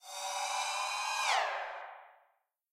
cymb shwish 14
cymbal hit processed with doppler plugin
cymbal, doppler, processed